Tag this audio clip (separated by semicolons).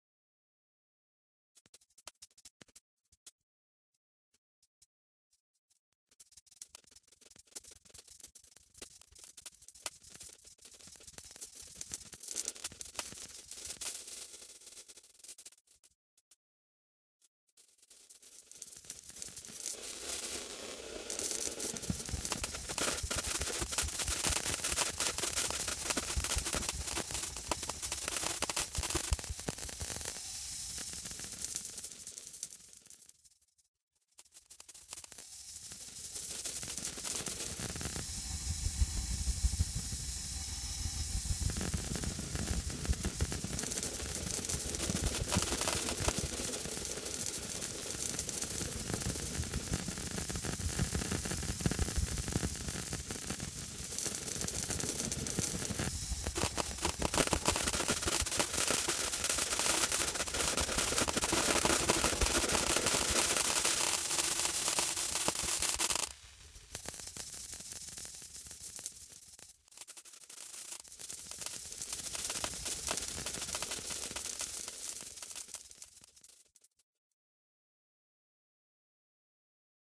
flock; granular